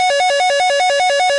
Executor Ring
Wailing From Laser Top
Games, Video, Sound-Effects, Recording